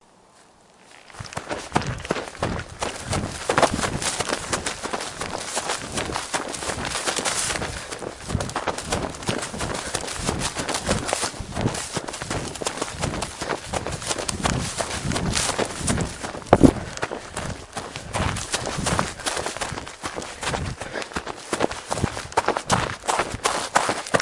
Running in forest/grass
Running hard in a grassy forest. Dead grass being crunched underfoot, and living green grass brushing against clothes. Wind gently blowing through the grass. A great piece of audio to add to a movie or a video.
grass, sprinting, jog, running, forest, dead-grass, jogging, sprint, run